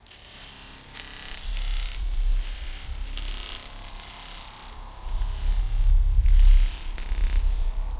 Glitch - steps
Walking on a rural village next to Castelo de Vide (Alentejo, Portugal). Field recording highly processed as a GLITCH Beat Loop. It was originally processed at 140 bpm, but it can be worked.
I've been using it in experimental electronic performances using a laptop with GIADA, and another one with ableton live.
svayam
experimental, footsteps, fx, glitch, pitch, processed